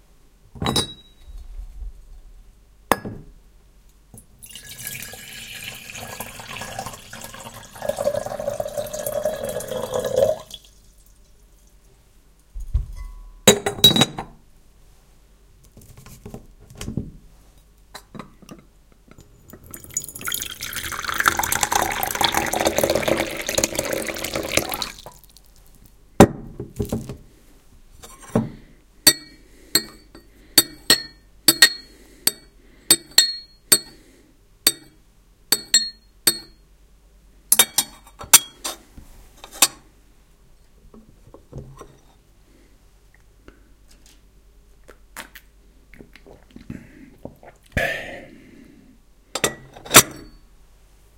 201122 05 A Nice Cup of Tea
The porcelain teapot lid is lifted, water is poured in and the lid replaced. a cupful is poured, the liquid is stirred and the spoon returned to the saucer. A drink is taken and an appreciative 'ahhh' is heard.